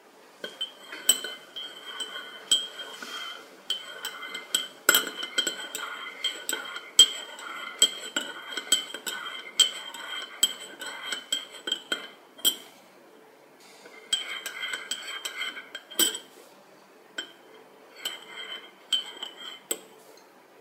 coffee cup remove removing with spoon fiddle tinkle 2
remove, tinkle, cup, removing, fiddle, spoon, coffee